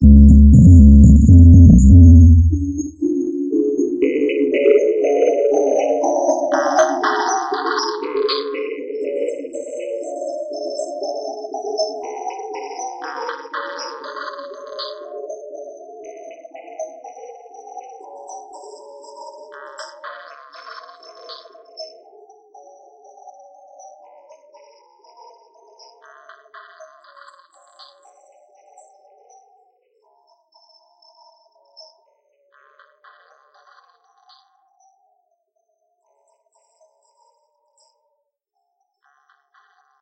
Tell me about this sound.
DISTOPIA LOOPZ 016 120 BPM
DISTOPIA LOOPZ PACK 01 is a loop pack. the tempo can be found in the name of the sample (80, 100 or 120) . Each sample was created using the microtonic VST drum synth with added effects: an amp simulator (included with Cubase 5) and Spectral Delay (from Native Instruments). Each loop has a long spectral delay tail and has quite some distortion. The length is an exact amount of measures, so the loops can be split in a simple way, e.g. by dividing them in 2 or 4 equal parts.
distortion, bpm, delay, loop, rhytmic